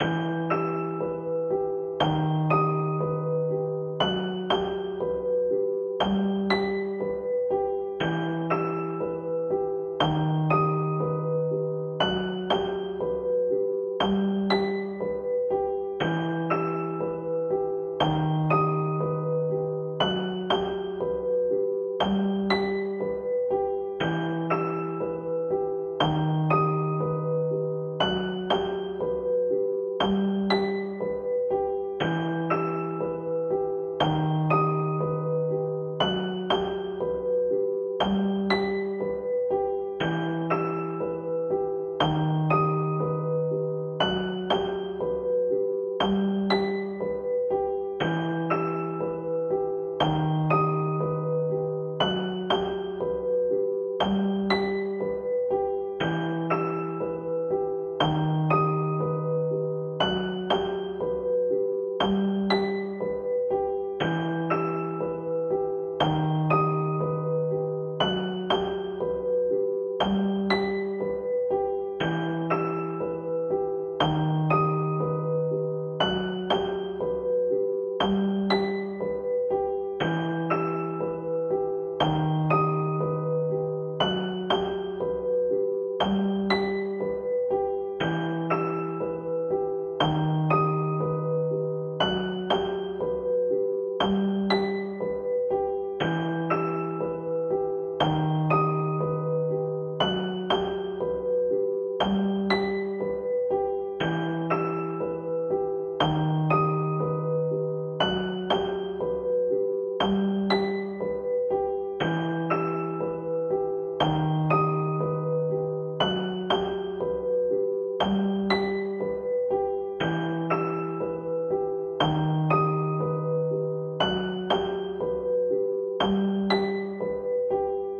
Dark loops 208 piano with melody long loop 60 bpm
This sound can be combined with other sounds in the pack. Otherwise, it is well usable up to 60 bpm.
dark, 60, piano, bpm, bass, 60bpm, loop, loops